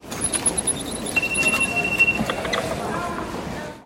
London Underground- ticket gates opening (2)
Ticket gates opening on the tube, with beeps as the gates open, indicating my ticket has been validated. Recorded 18th Feb 2015 with 4th-gen iPod touch. Edited with Audacity.
ambiance, ambience, barrier, beep, beeps, close, field-recording, gate, gates, london, london-underground, metro, open, station, subway, ticket, ticket-gates, tube, tube-station, turnstile, underground, validate, wembley-park